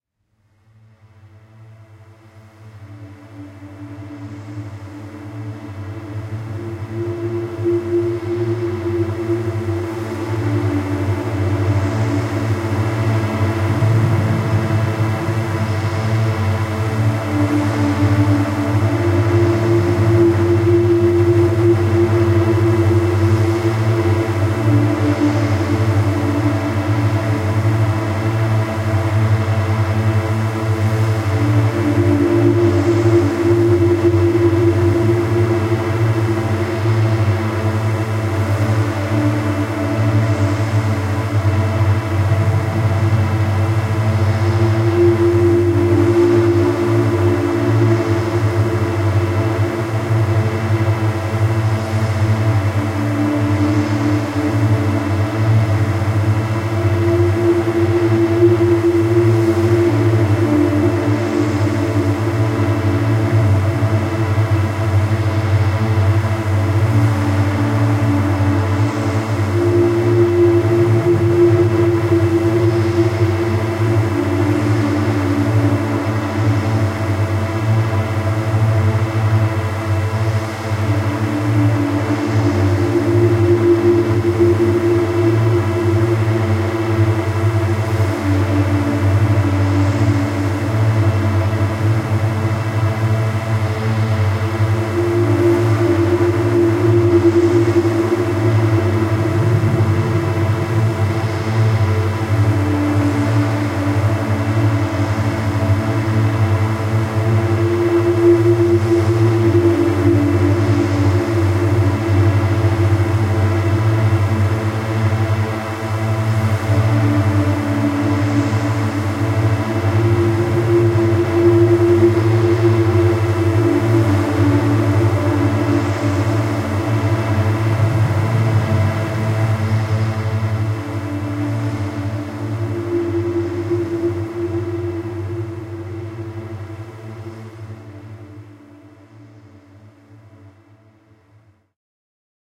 Mirage on Mars
After being stranded on Mars for days without water, you gaze through the spaceships window and see what appears to be blue liquid flowing in the distance. Should you make the journey?